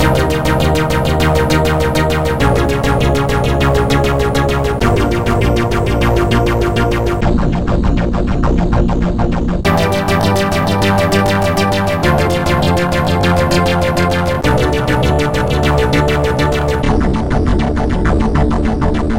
This is my take on Notofficial's 'another3oh3' piece.
It is a bit coarse, since I made it in Audacity. Enjoy!